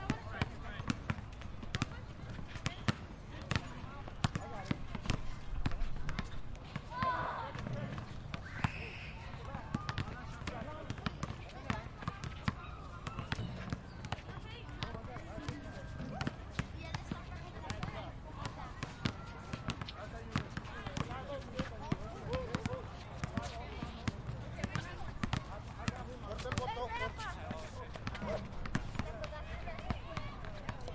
Day Playing Basketball
A recording of playing basketball during the day.
day, playing, basketball, field-recording